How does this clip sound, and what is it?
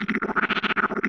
Electronic sound produced with AudioMulch, then processed heavily with Audacity. Nice for looping.